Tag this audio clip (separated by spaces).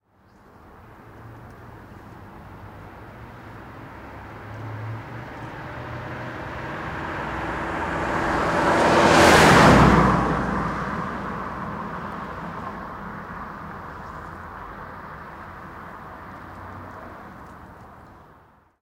pass passing